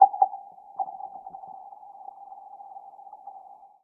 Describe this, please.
this sample is part of the icefield-library. i used a pair of soundman okm2 mics as contact microphones which i fixed to the surface of a frozen lake, then recorded the sounds made by throwing or skimming several stones and pebbles across the ice. wonderful effects can also be achieved by filtering or timestretching the files.
chill, cold, crack, field-recording, ice, lake, skid, winter